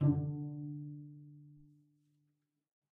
One-shot from Versilian Studios Chamber Orchestra 2: Community Edition sampling project.
Instrument family: Strings
Instrument: Cello Section
Articulation: tight pizzicato
Note: D3
Midi note: 50
Midi velocity (center): 31
Microphone: 2x Rode NT1-A spaced pair, 1 Royer R-101.
Performer: Cristobal Cruz-Garcia, Addy Harris, Parker Ousley
multisample, tight-pizzicato, cello, strings, midi-velocity-31, cello-section, vsco-2, d3, midi-note-50, single-note